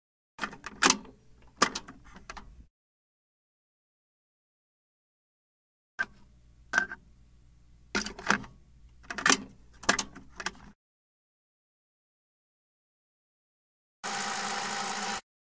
Cassette Noise When Got In
It's a sound of a casette when is getting in of a player.
Cassette, Effects, Fi, Filmaking, Lo, LOFI, Music, Old, Vintage